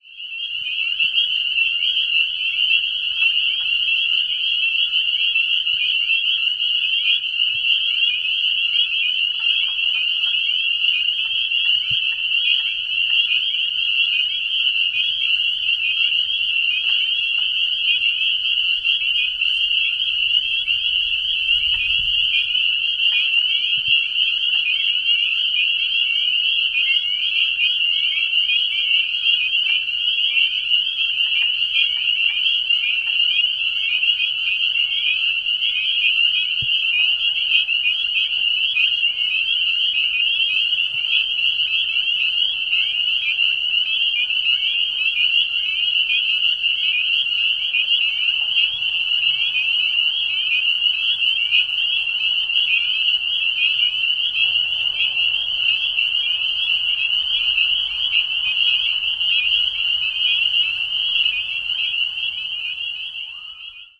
EXT peepers MED POV XY
Closer perspective Predominantly Spring Peepers andother frogs probably Boreal chorus frogs. Active spring wetland at dusk. This is a quad recording. XY is front pair and file with same name but MS is back pair. Recorded with an H2 Zoom. Ends with car noise. (Town Security arriving to check out what I m doing.)
spring, field-recording, frogs, nature, wetlands, peepers